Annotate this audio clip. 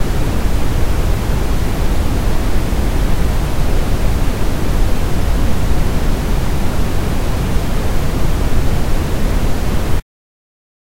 The sound of wind howling.
nature, wind, howling